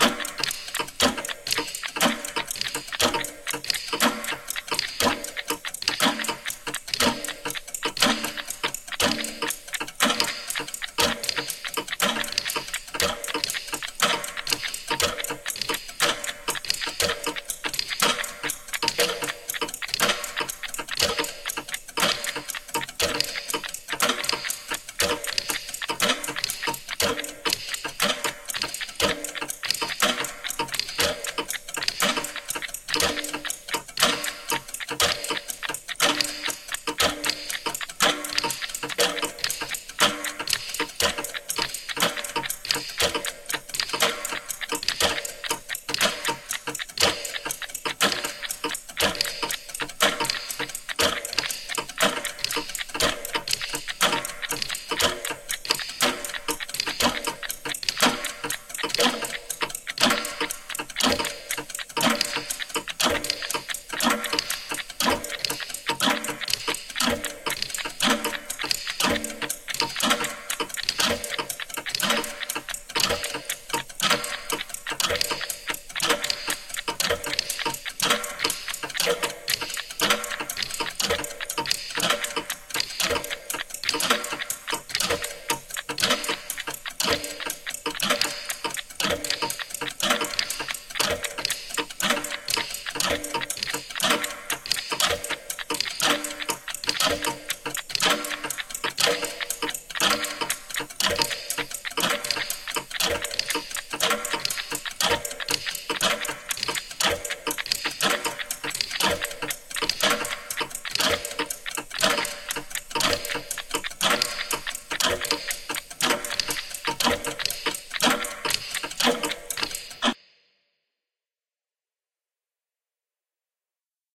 Clocks Ticking
clock, grandfather-clock, tic, ticking, tick-tock